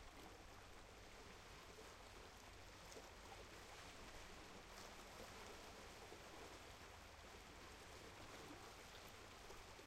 no one on beach it gone be rain or not...
sea, kantrida, beach, rijeka